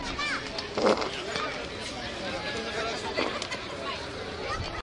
washington lincoln outfarts
Two patriotic farts outside the Lincoln Memorial recorded with DS-40 and edited in Wavosaur.